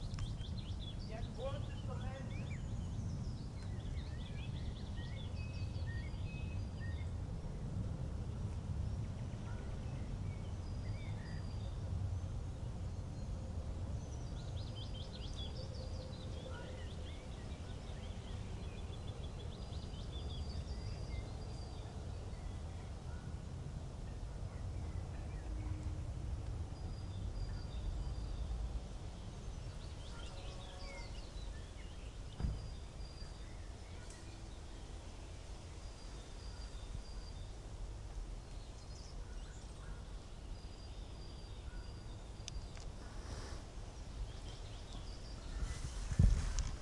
Forest birds wind
Birds, wind